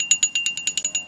Sound of followed thuds on the glass, recorded with a very simple microphone and edited to be cleaner.